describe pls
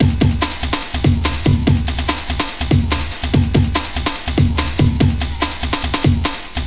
Hardstyle Sounds 140 bpm songs Blutonium Boy, Soundstudio
140, Blutonium, Boy, bpm, Hardbass, Hardstyle, songs, Sounds, Soundstudio